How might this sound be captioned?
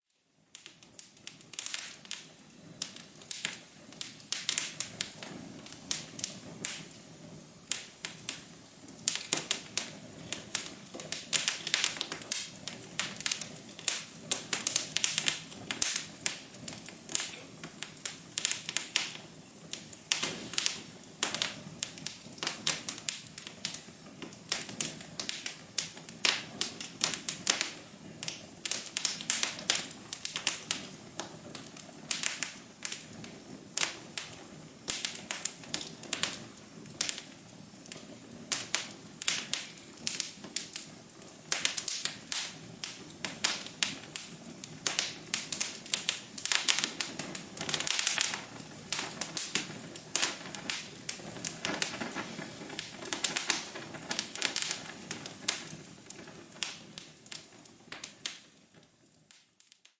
Crackling Fire
The sound of fire crackling in a fireplace.
fireplace, burning, crackling, flames, fire